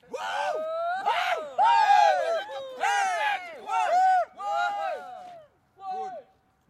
Group of people - Screaming Yeaaaah - Outside - 01
A group of people (+/- 7 persons) cheering and screaming "Yeeaah" - Exterior recording - Mono.
cheering, people, Group